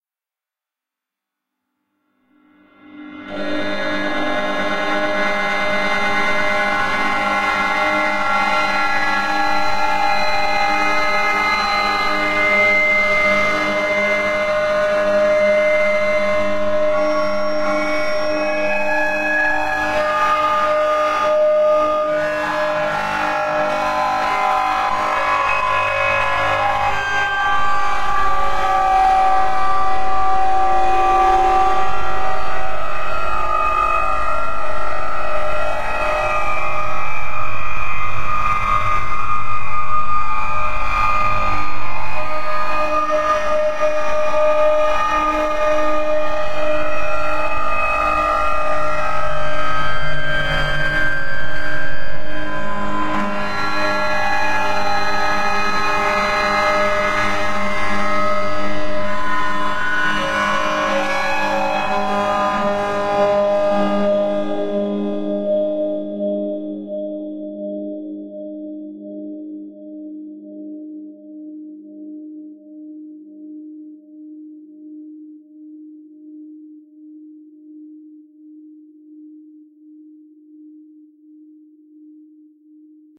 experimental 8 bit audio research 2
Old experiments using a simple 8 bit VST controlled by a midi keyboard. Recorded in real time by messing around with my effects rack in my DAW, changing effect orders on the fly, disabling and enabling things etc. Very fun stuff :D